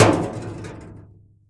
Metal Impact - Ceramic Piece in Sink
A broken piece from a ceramic jar being dropped into a metal sink.
echo, mono, metal, metal-impact, metallic, tin, impact, sink, 16bit, field-recording, hit, drop